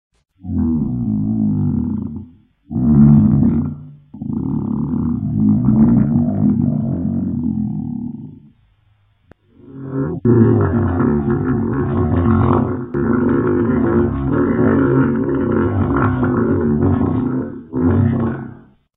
My Starving Stomach Moans
Now I spent 230 hours without food, and I was resting, caressing my stomach this whole time, and I forgot to mention I was in my same clothes: my bathing bikini suit with my high-heeled multistrapped sandals; this entire time. My stomach can't wait any longer. It needs to be fed now! Ooooooooooooouuuuugh!!!
hungry, moan, starvation, rumble, borborygmus, stomach, growl, roaring, grumbles, rumbles, roar, recording, tummy, starving, sounds, borborygmi, grumbling, roars, humans, growling, rumbling, females, soundeffect, moaning, sound, belly, growls, grumble, moans